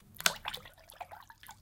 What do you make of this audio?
Tiny Splash
Dropping a plastic pen into a large bowl of water. Could be used for other lightweight objects falling into water.
fish, pen